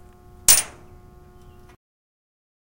Electric Coin was recorded in the woods behind UCSC with a Tascam DR100mkii
ambient, drum-kits, field-recording, industrial, percussion, sample-pack